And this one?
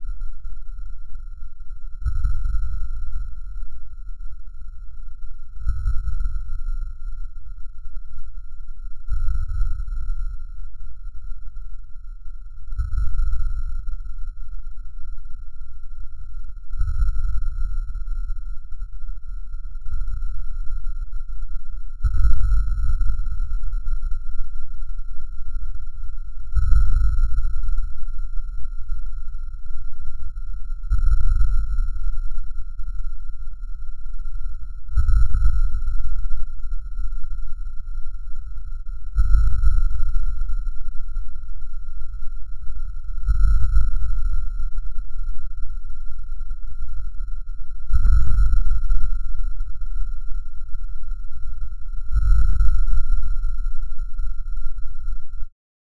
This is the sound of a group of harp strings being excited using the hairs of a violin bow, but processed in two iterations.